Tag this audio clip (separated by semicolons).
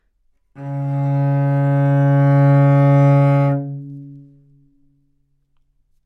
single-note; neumann-U87; good-sounds; multisample; Csharp3; cello